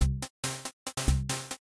Analog Beats 2
Second analog drum loop using white noise
noise, dirty, beat, hat, old, drum, snare, loop, analog, kick, white, hi, school